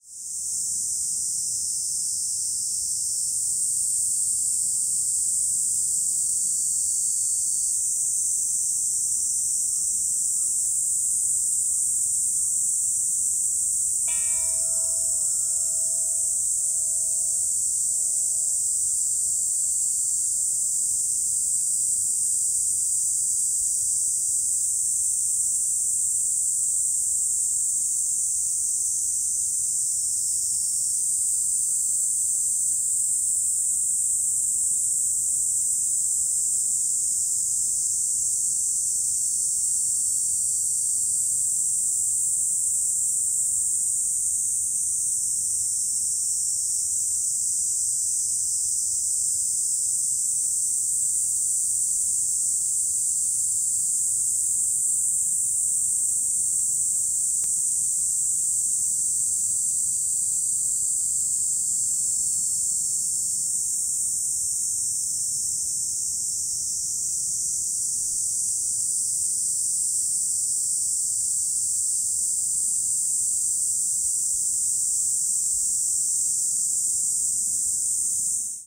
enoshima bugs bell
Enoshima Island in Japan. Near a small wooded area, populated with lots of cicadas, they create a cacophony of tunes. You can also hear some birds and a single bell being rung.
Recording made on 22 July 2009 with a Zoom H4 recorder. Light processing done with Peak.
ambience, asia, bell, enoshima, island, japan, kamakura, outdoor